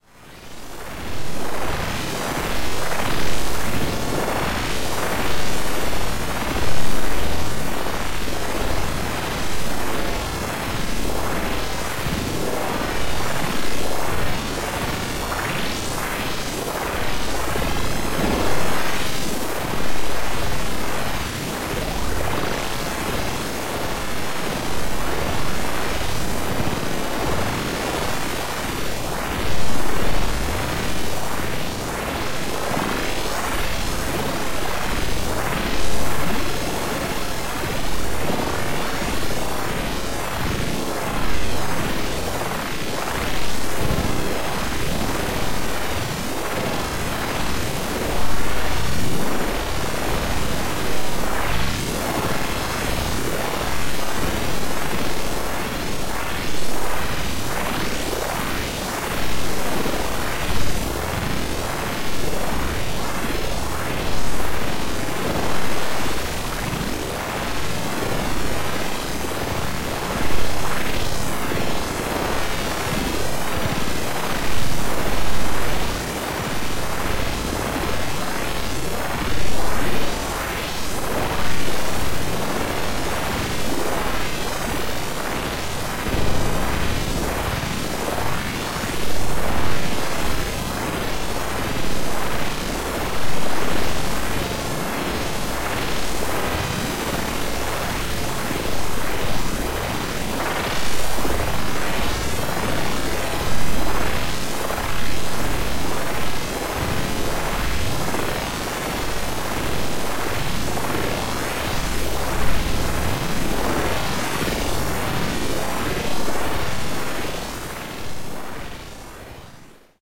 Noise Garden 14
1.This sample is part of the "Noise Garden" sample pack. 2 minutes of pure ambient droning noisescape. Spacey noise mess.